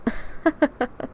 A cute girl chuckle.
Created using my own voice, with a Blue Snowball microphone and Audacity. Sped up slightly.